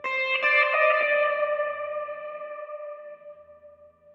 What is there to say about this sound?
A solo guitar sample recorded directly into a laptop using a Fender Stratocaster guitar with delay, reverb, and chorus effects. It is taken from a long solo I recorded for another project which was then cut into smaller parts and rearranged.